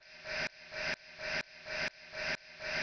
I recorded a klaxon and i used the GVerb.